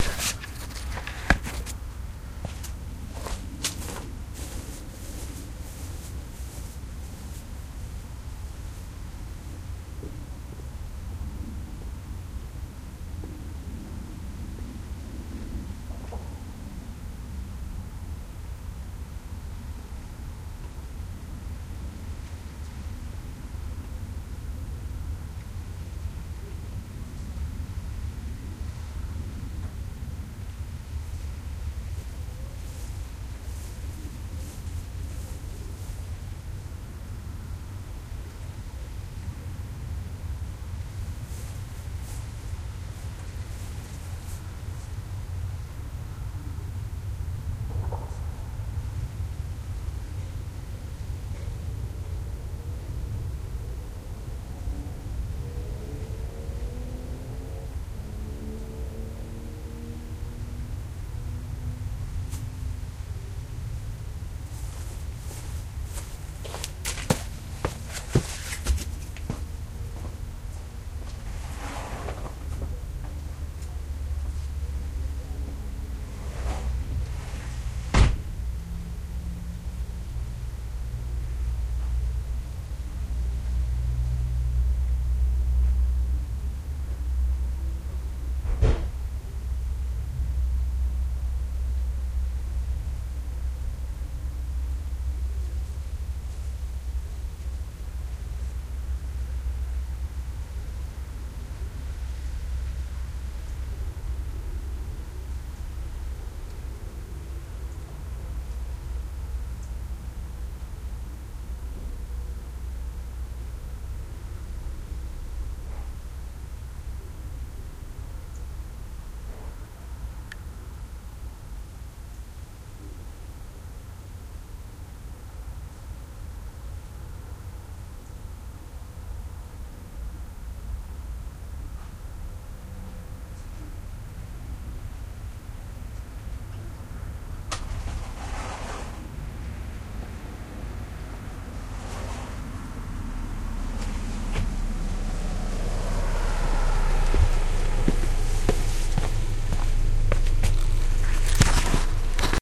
Not sure, baby heartbeat, quiet cat, missed event.